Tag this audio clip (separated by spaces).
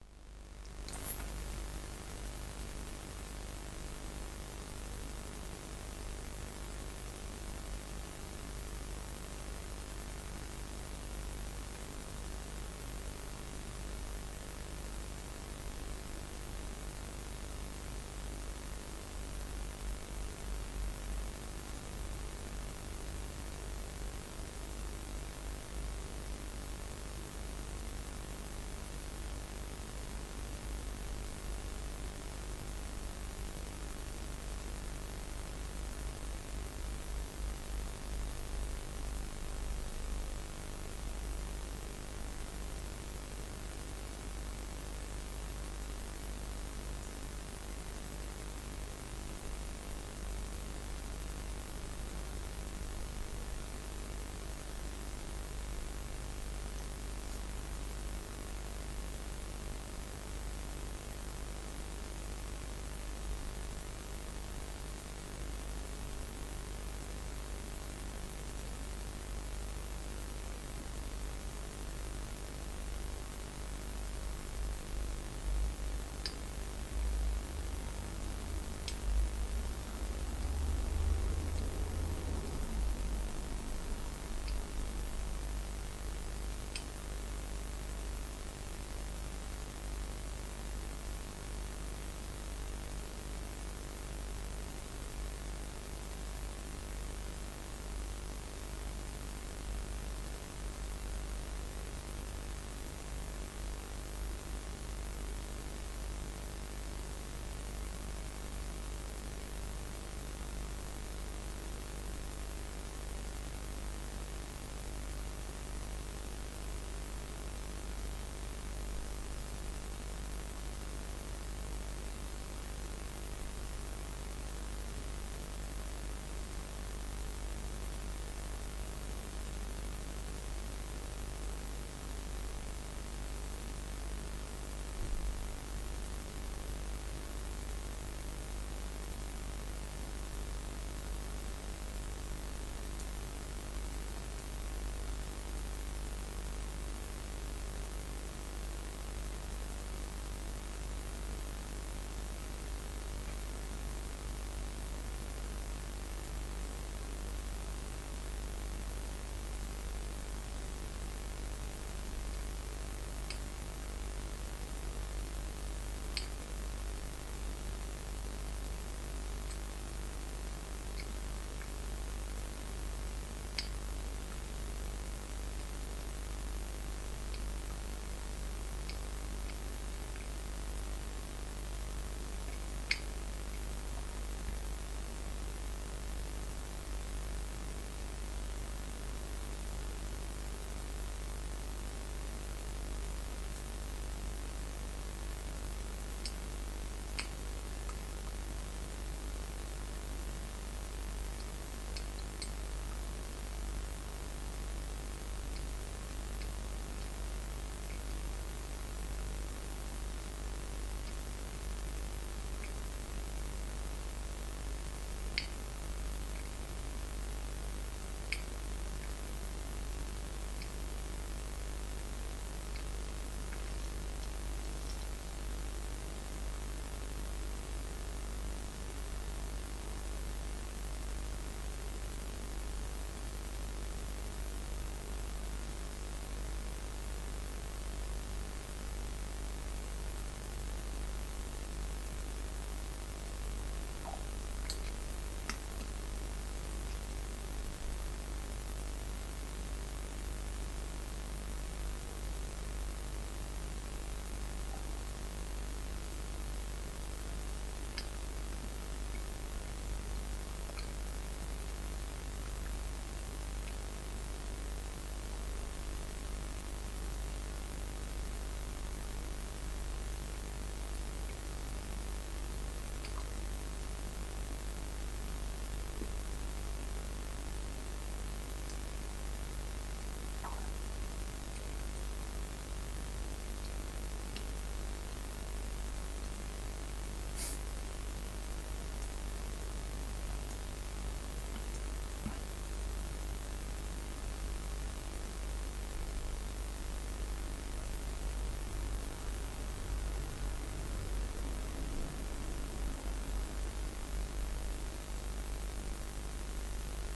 Engine Channel Battery WideBand Calculator Trail Beam Mirror Flow Curve Networking Path ECU Unit UTV Wireless Symmetric Broadband Iso Solar Scalar Wave Control Linear Analogic Fraser Field ATV Lens Jitter